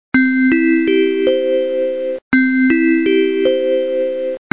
ding dong dang school